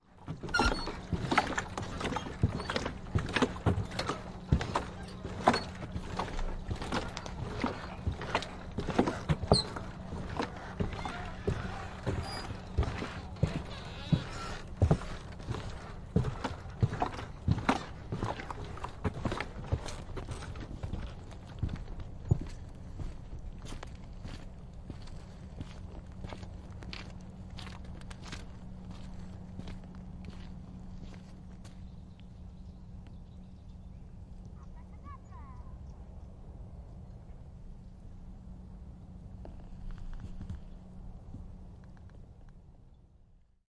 Footsteps Walking Boot Pontoon to Standstill
walking, footsteps, gravel, pontoon, boots, water